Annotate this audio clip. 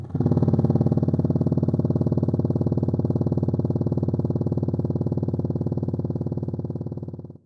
Jackhammer work (outside)
Jackhammer work outside my window
drill, jack-hammer, hammer, room, noise, drilling, jackhammer, work, outside, road, construction, window, pneumatic